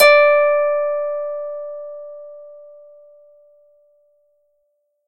Celtic Harp D5
I used the `Pluck`-Function of Audacity,to create this sound.
Celtic-Harp, Ethnic, Harp, Koto, Plucked, Strings